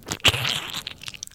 Step on a slug (Splat!) 1
splat, muddy, mud, cartoon, boots, quicksand, gross, squish, wet, slug, ooze, slime